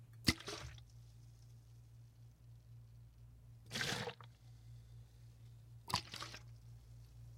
Sloshes In Big Bottle FF266
Liquid sloshes in larger bottle, shorter, hitting sides of container
bottle, liquid, slosh